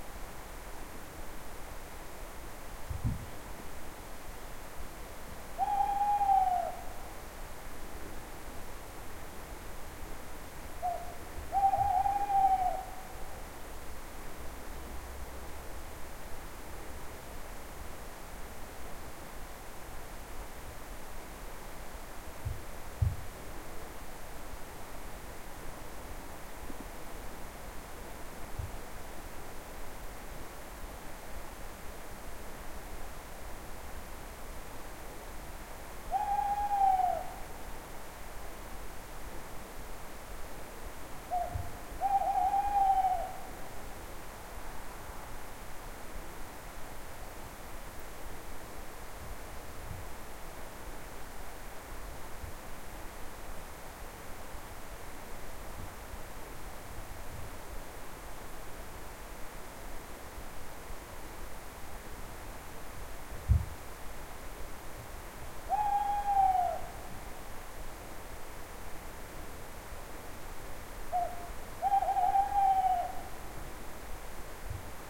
A short recording of an owl, using a Sony TCD-D7 DAT recorder and a set of Sennheiser K 6 / ME 64 microphones. It was early in the evening in October 2006 and that owl wasn´t far away.
scotland, field-recording, owl, bird